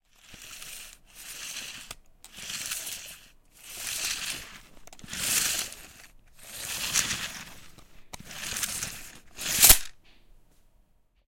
Pull-meter
Several kinds of pull meter.